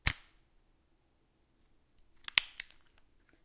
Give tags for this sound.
click; foley; razor; switch

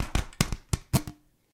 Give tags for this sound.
egoless; noise; scratch; sounds; vol

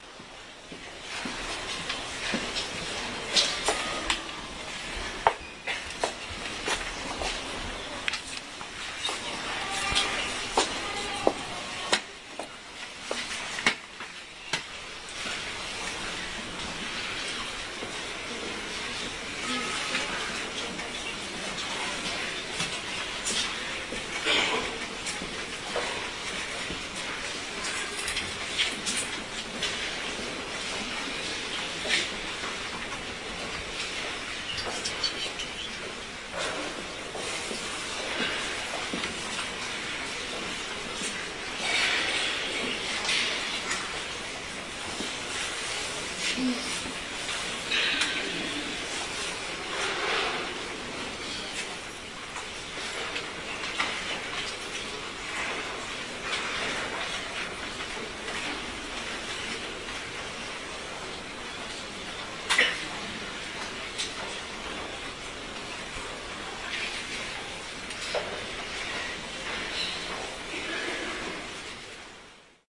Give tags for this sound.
procession; corpus-christi; people; mass; wilda

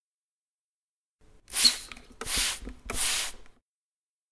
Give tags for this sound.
chores; CZ; Czech; household; Pansk; Panska

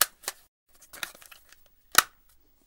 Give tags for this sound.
Cigarette; Clang; Drop; H4n; Metal; Zoom

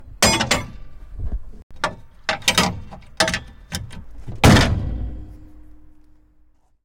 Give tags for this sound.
master; automobile; metal; big; motor; auto; foley; truck; road; traffic; unlock; hood; vehicle; close; mobile; renault; engine; bus; car; open; metallic; mechanism; lock

